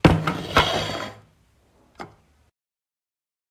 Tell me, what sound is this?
Platos en la mesa
They were putting the dishes on the table and I thought the sound was particular so I recorded it.